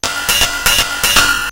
These are TR 505 one shots on a Bent 505, some are 1 bar Patterns and so forth! good for a Battery Kit.
a, circuit, drums, glitch, higher, oneshot